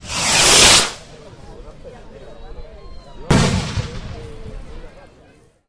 A firework rocket ignited close by.
hard, crack, close, firework